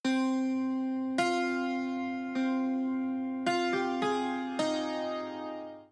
Short phrase played on a persian santur.